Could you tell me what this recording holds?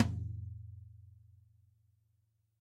Drums Hit With Whisk
Drums, Hit, Whisk, With